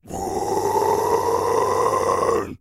Toni-DeepGrowl2
Deep Growl recorded by Toni
deep, growl, voice